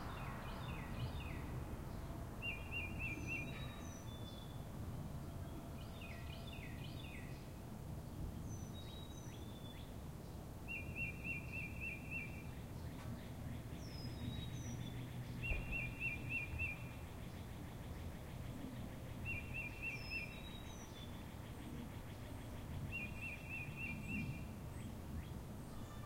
Birds Singing 03
Birds singing on a pleasant spring day in Virginia.
singing, spring, birds